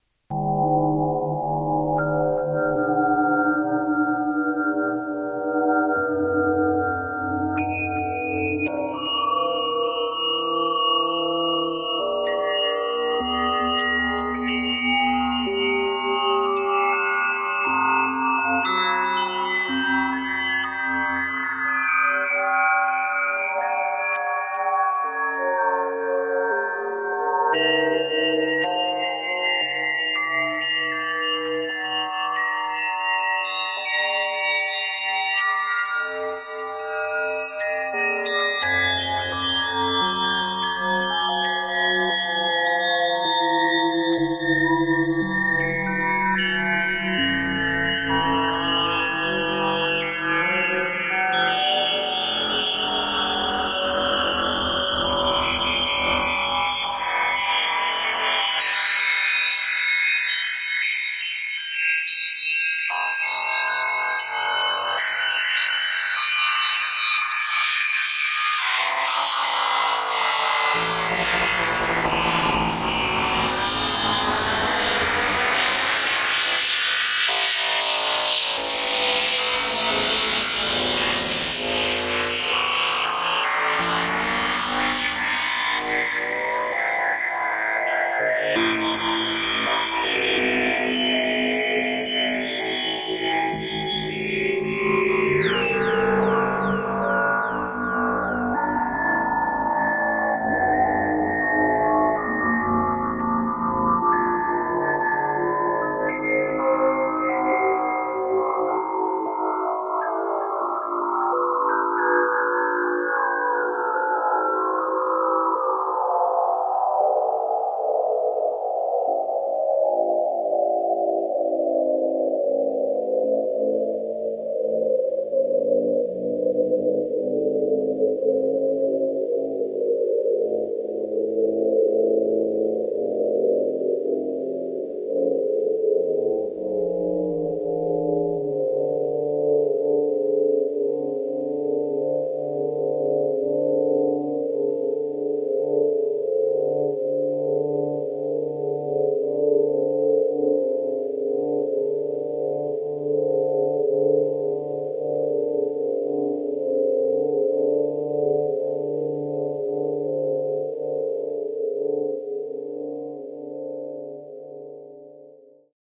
This is an impression of water. It is created with the Clavia Nord Micro Modular and processed with a Boss SE-50. 'Water' consists only of sine-waves, that represent its fluidness.
As water can be steam, rain as well as ice, the waves are modulated
into a different spectrum. Water is affected by the other elements.
ambient, competition, elements, soundscape, water